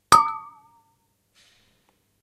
samples in this pack are "percussion"-hits i recorded in a free session, recorded with the built-in mic of the powerbook
boing, bottle, metal, noise, ping, pong, water